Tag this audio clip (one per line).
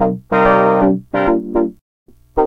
analog
chord
instrument
instrumental
lo-fi
lofi
loop
noisy
synth